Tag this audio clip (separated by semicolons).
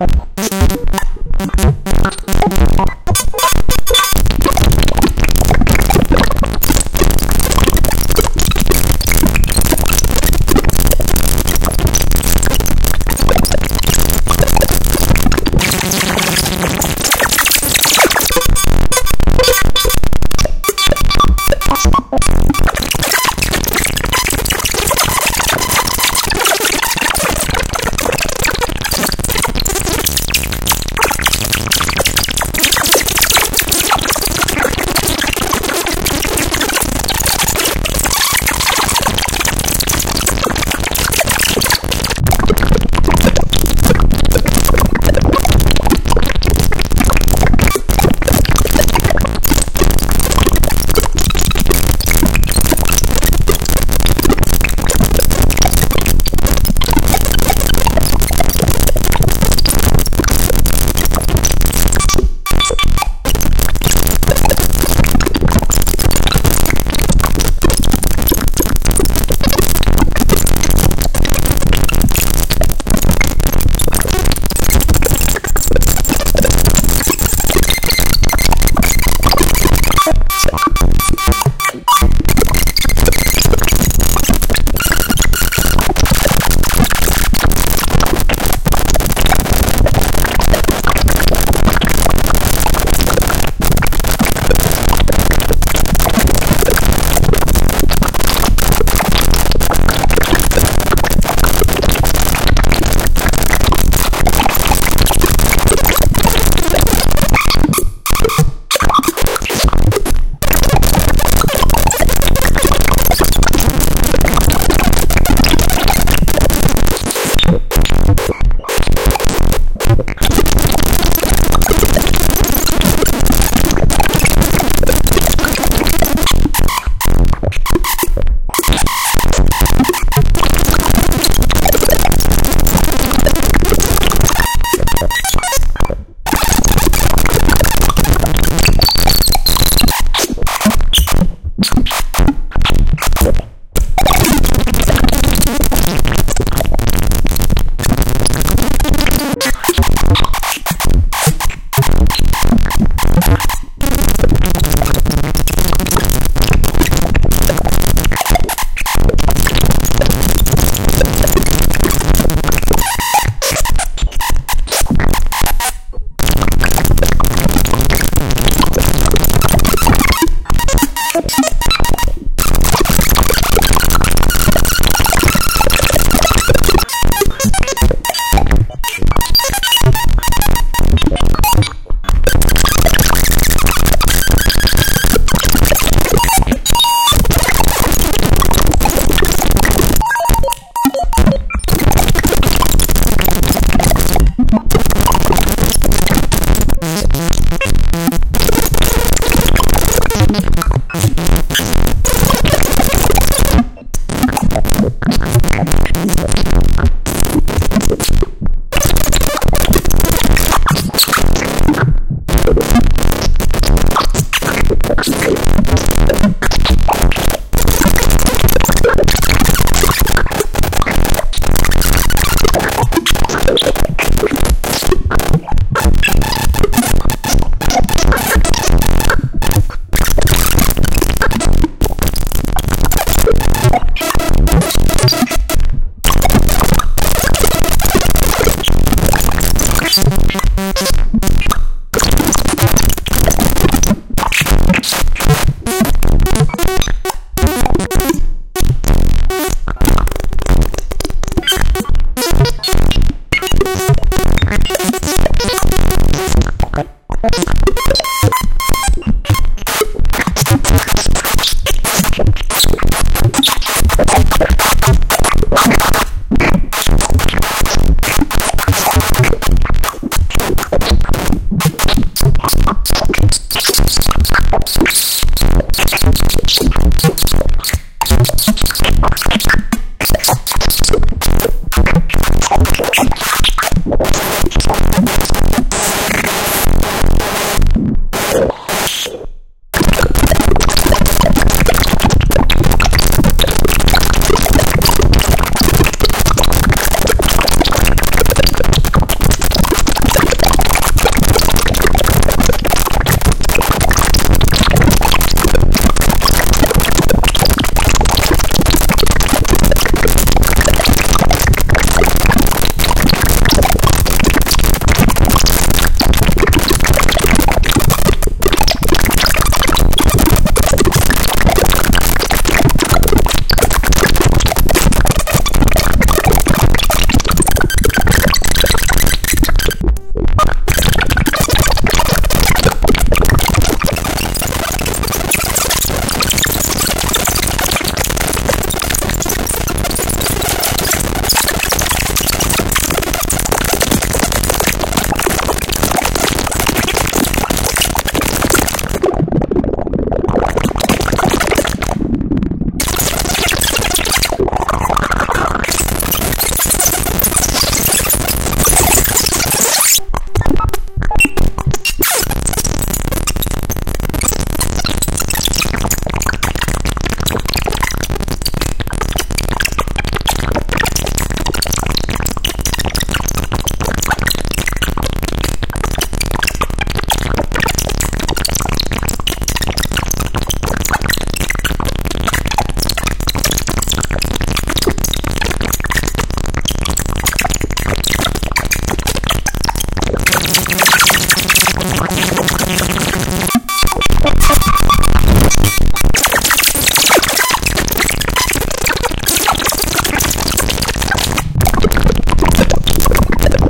digital; synth; noise; percussive; modular; glitch